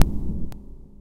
The sample is a short electronic click followed by some weird reverb noise.
click, electronic